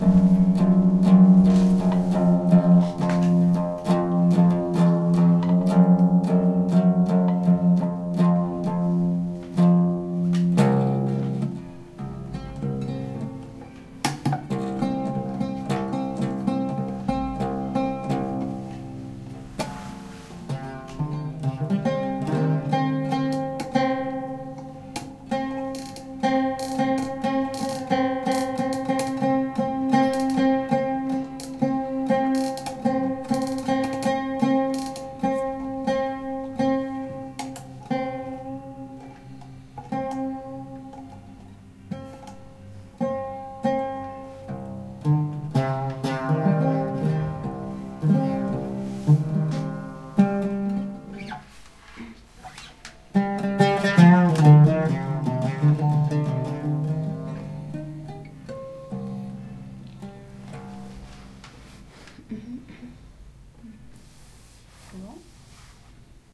Young Moroccan musician tuning her ud before rehearsal (French Institute, Fez).
Zoom H2
16 bit / 44.100 kHz (stereo)
Fez, Morocco - february 2010